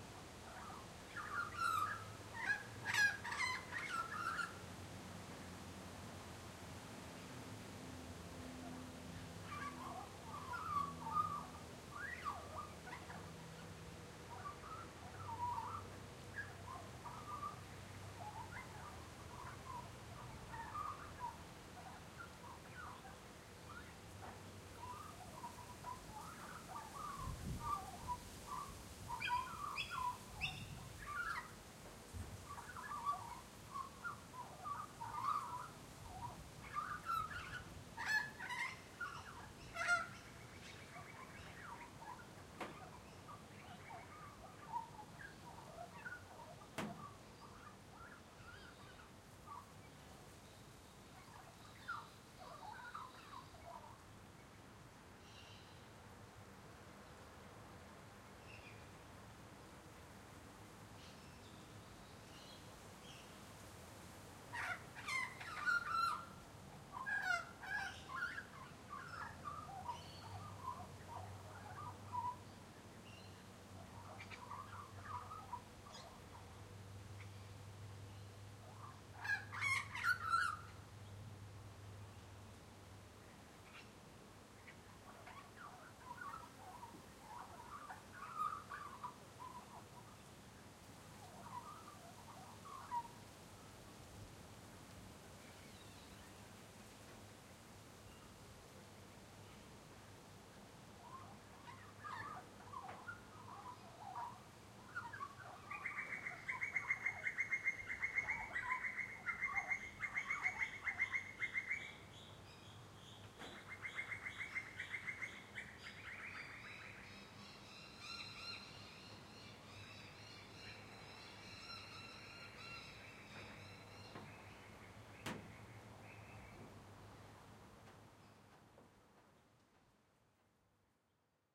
Warbling Magpie Suburban Sounds
Fairly quiet (but quite audible) suburban recording (complete with background noises) of an Australian Magpie (Gymnorhina Tibicen) standing about 15 meters up a tree 'carolling / warbling'. At 1:45 a Noisy Miner starts an alarm call and then some distant ones kick in too. Not a great recording, the 'hiss' is actually wind in the trees. Works OK through headphones. Recording chain: Rode NT4 (stereo mic) – Sound Devices Mix Pre (Pre amp) – Edirol R-44 digital recorder.
gymnorhina-tibicen, australian-magpie, warbling, avian, suburban, carroling, magpie, warble, bird, carolling